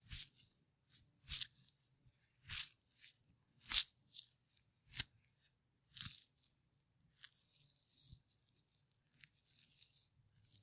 juicey blood
I made these various "squish" sounds by recording lettuce being crushed on my Rode Hotshoe mic. this is one of my first tries at folly, so it may not be the cleanest, feedback is excepted, and expected. thank you, hope you can use it, anyone can use it for anything, even for profit.
arm, blood, bone, bones, brain, break, crunch, flesh, fx, gore, gross, horror, horror-effects, horror-fx, intestines, leg, limbs, neck, punch, squelch, squish, tear, torso, vegetable, zombie